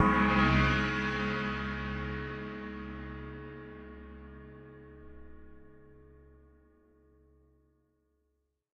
China Gong 01
cymbal cymbals drums one-shot bowed percussion metal drum sample sabian splash ride china crash meinl paiste bell zildjian special hit sound groove beat
crash, zildjian, one-shot, sabian, paiste, percussion, bell, hit, groove, china, cymbals, cymbal, sound, gong, beat, special, drum, sample, ride, drums, metal, bowed, meinl, splash